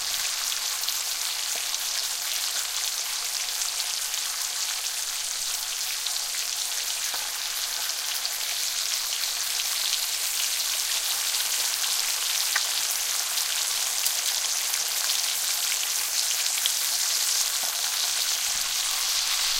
bacon-frying
Bacon frying in a cast-iron skillet
bacon, sizzle, food, household, cooking, pan, frying